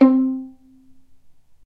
violin pizz non vib C3
violin pizzicato "non vibrato"